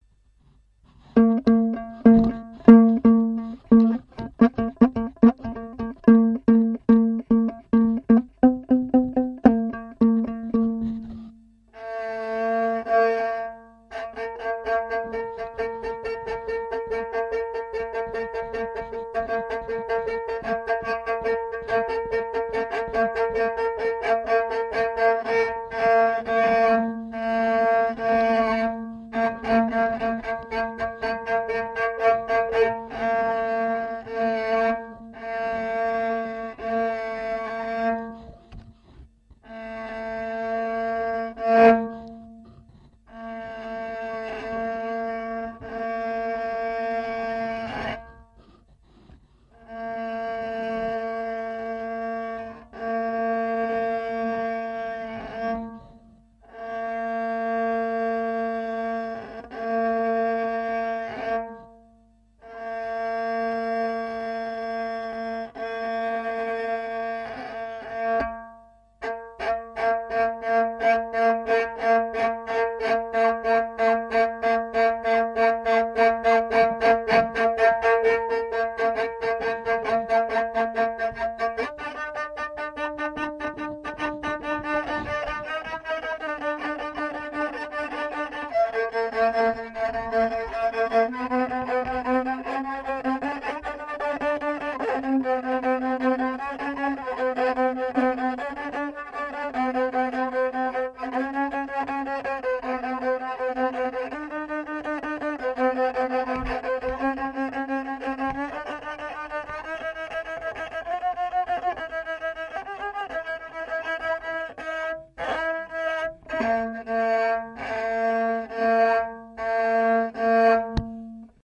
The sound of tynryn (Nivkh fiddle), recorded in a village named Nekrasovka (in the North-West of Sakhalin island) by Michail Chayka.